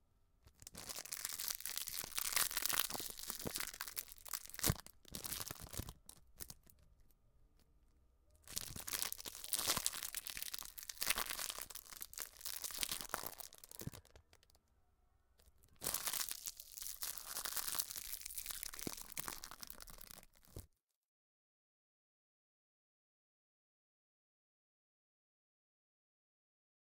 Stretching a piece of plastic
Stretch, Hands, Plastic